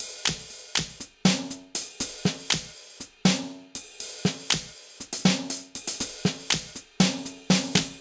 hihats 120bpm reverb snare-16
hihats 120bpm reverb snare
club, beat, electronic, drum, dubstep, techno, trance, dance, dub-step, snare, rave, house, reverb, loop, 120bpm, electro, drums, hihats